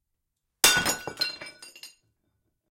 mug breaking
mug, shattering, breaking, coffee